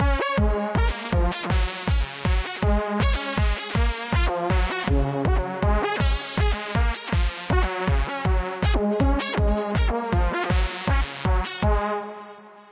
first loop mad in fl studio
studio, techno, fl